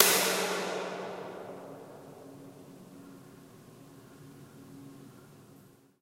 STEAM ROOM CLAP 2
relaxing in the steam room, stumbled across greatness. recorded on iphone.
steam, echo, room, reverb, clap, ambient